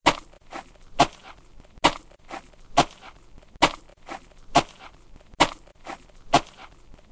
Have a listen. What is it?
walking on the gravel
earth, footstep, footsteps, gravel, ground, sand, steps, walk, walking